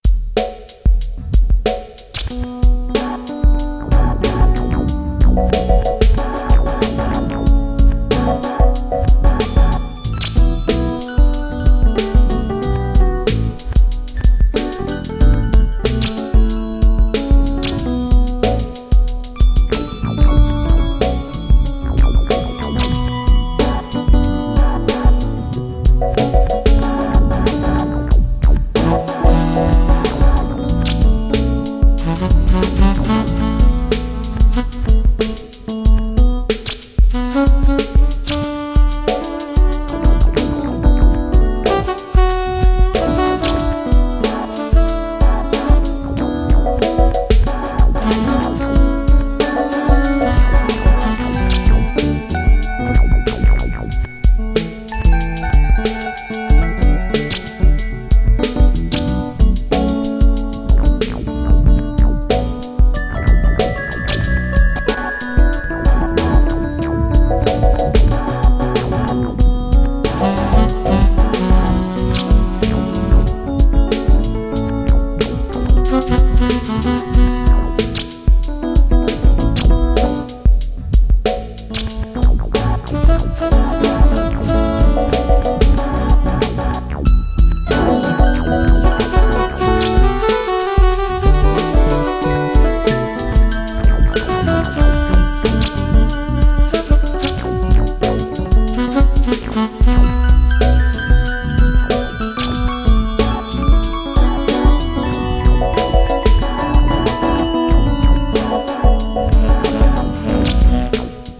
Sexy Jazz Loop
I created these perfect loops using my Yamaha PSR463 Synthesizer, my ZoomR8 portable Studio, Guitars, Bass, Electric Drums and Audacity. The music was written and performed by me.